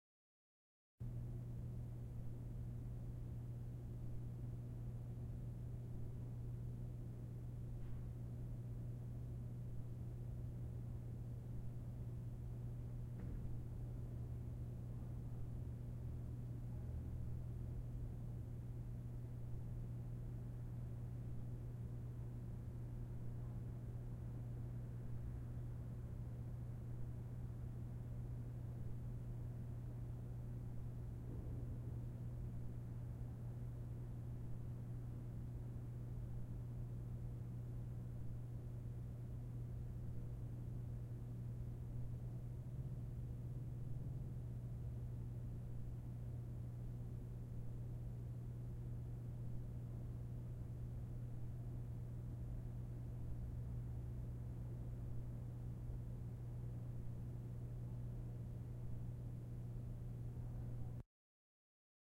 Room Tone Ambience Large Theatre Low Hum
This was recorded in a large theatre. It is not completely silent so it has a nice unique tone.